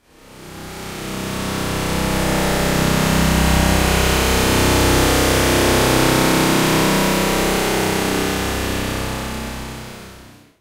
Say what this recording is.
Glitch Transition
Overkill of effects in Audacity make some kind of electric transition.
distant, electric, far, horror, psycho, science, transition